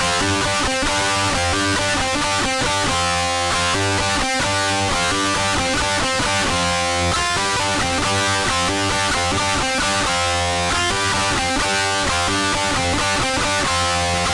REV GUITAR LOOPS 17 BPM 133.962814
all these loops are recorded at BPM 133.962814 all loops in this pack are tuned 440 A with the low E drop D